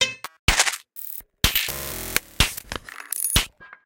SnaredArtifacts 125bpm02 LoopCache AbstractPercussion
Abstract Percussion Loops made from field recorded found sounds
Abstract, Loops, Percussion